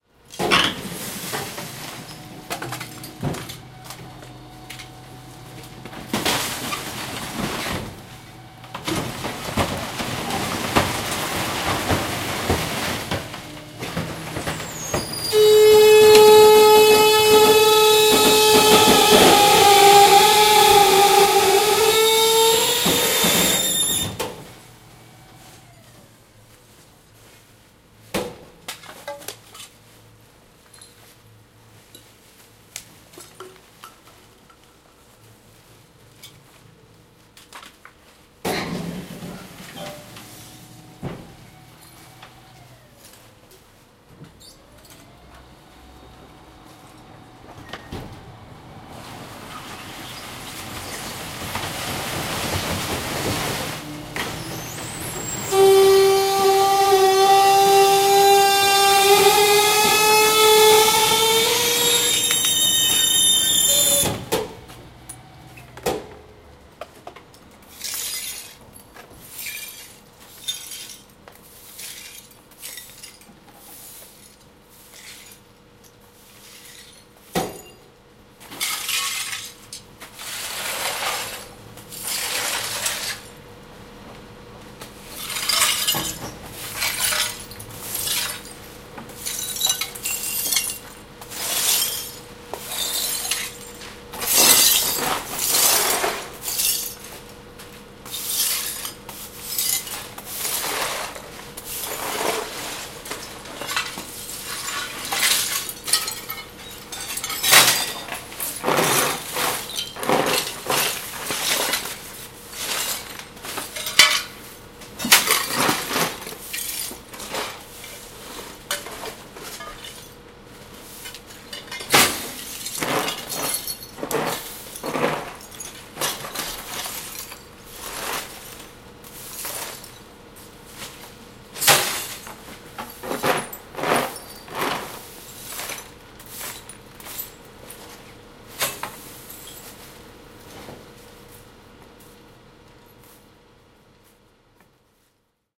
field recording of a dump truck compressing/destroying bulk trash in a desert street of a parisian suburb. wood breaking, glass breaking, impacts, glass being swept away, truck engine in the background. Recorded with a zoom h2n in X/Y stereo mode.